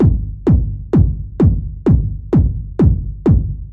synth kick boom dof
electronic, kick